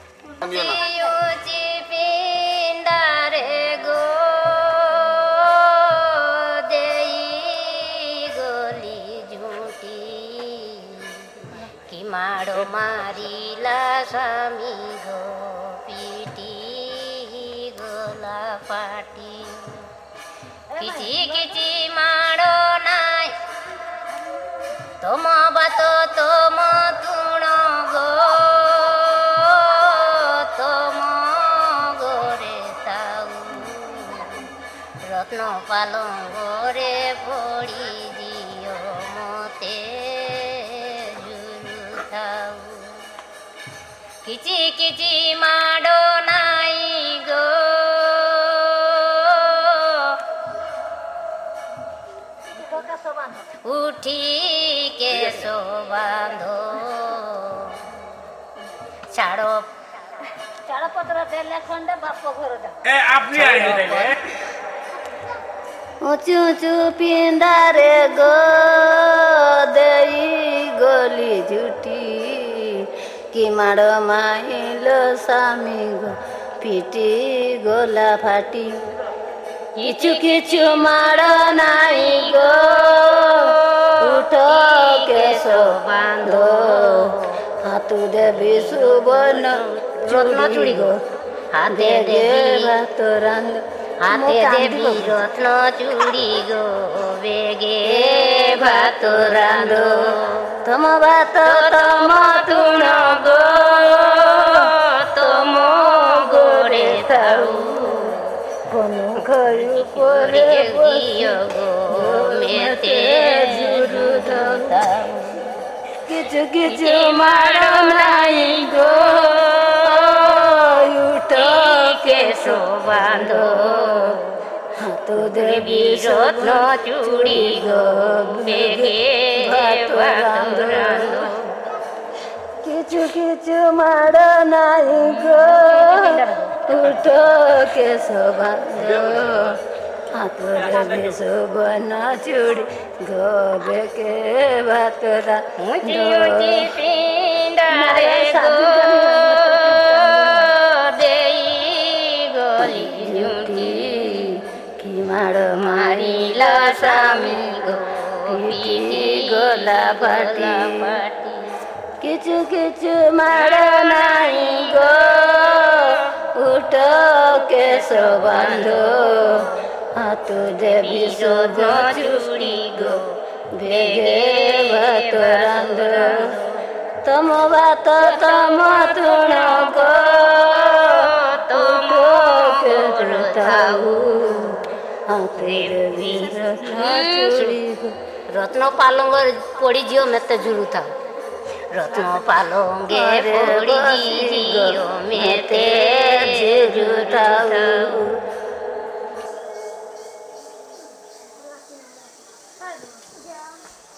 Women song echo
Sound of a Tea garden workers signing at leisure.
ambient, Tea, Garden, Field-recording, Sylhet, Makbul, Bangladesh